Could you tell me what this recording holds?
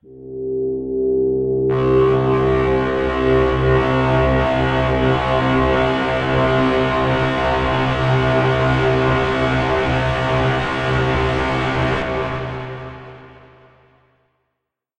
THE REAL VIRUS 07 - GIGANTIC - C3
Big full pad sound. Nice evolution within the sound. All done on my Virus TI. Sequencing done within Cubase 5, audio editing within Wavelab 6.
pad, multisample